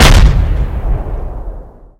Dark Detonation Type 01
This sample is actually just a Pyrocracker explosion.I recorded this with my Handy mic.This sample has been Modified using Fl-Studio 6 XXL and Audacity.this Sound have been processed several times to generate this "Bassy" Sound